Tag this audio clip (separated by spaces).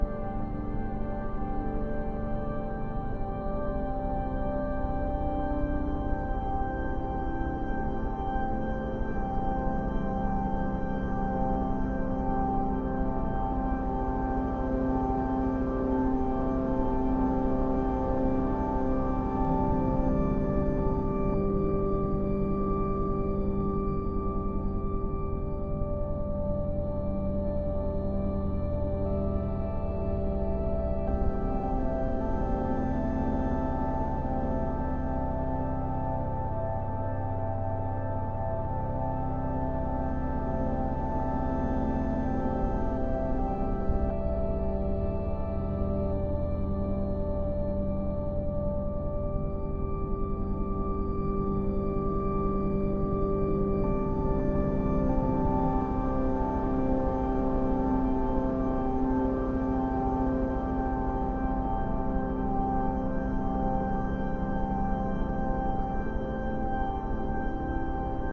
drone loop cmajor positive awakening pad organ